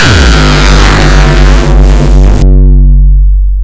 BassIndi5 (heavy)
A short Bass , Its hard , and its good for Hardcore Tracks
gabba, hardcore, gabber, loud, bass, sick, terror, hard, noize